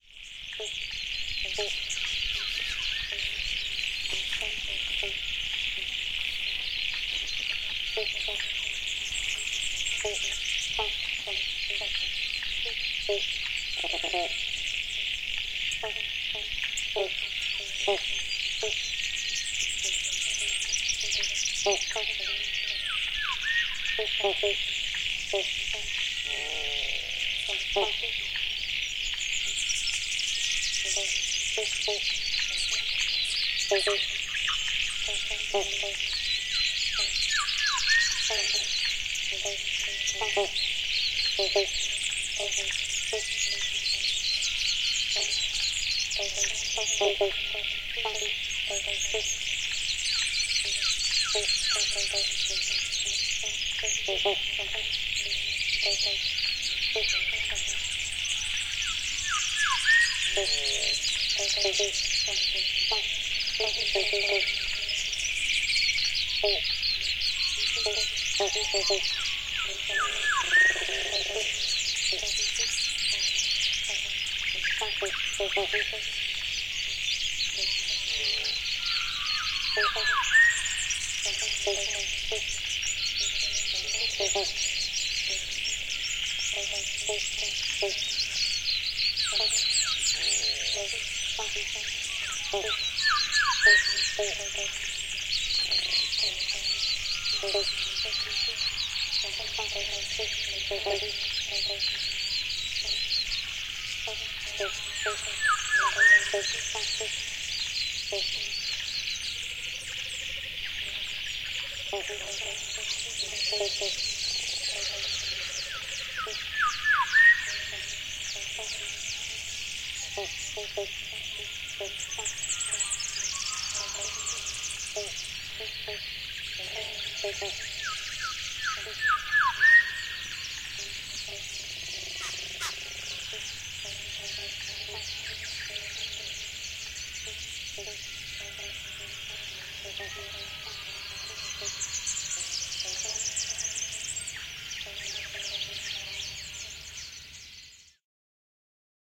Stereo Mic Experiment #2
This is a little experiment. I had two stereo mics recording into two separate recorders. A rode NT4 into a Zoom 4 and an MS set up using a Sennheiser 416 paired with a MKH-30 staight into the Zoom H4n. The Rode NT4 was positioned by the lake, surrounded by wonderful frog sounds, the MS was 80 metres away from the lake. I rolled on both recorders and made a sync point with a loud clap near the Rode mic.
Using Reaper, i synced the two tracks, then did a very long cross fade (almost the duration of the trach ie 2 plus minutes) In this piece the pespective travels from the lakeside mic to the distant mic. The idea being that i would create the sound illusion of moving from the lake to 80 metres away from the lake.